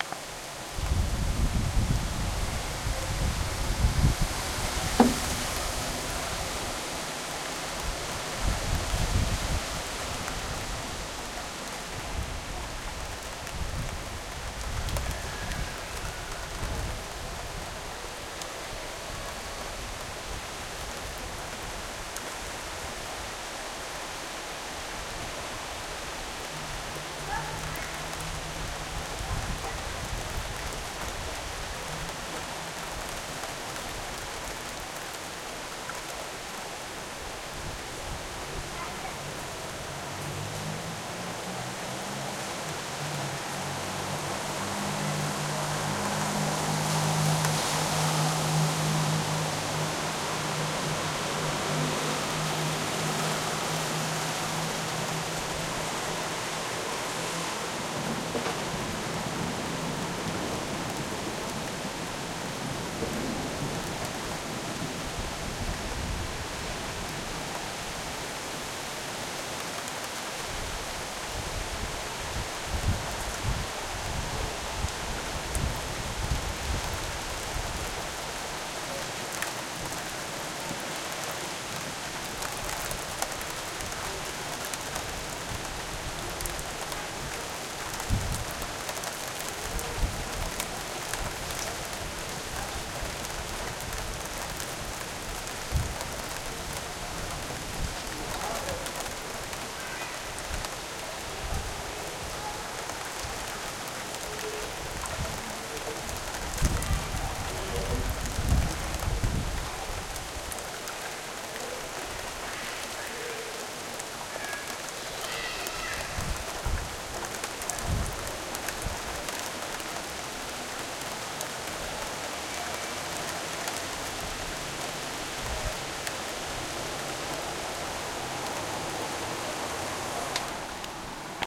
Soft rain in Berlin with thunder in background
rain crackles on window sill in 'Berlin Prenzlauer Berg
weather, thunder, home, rain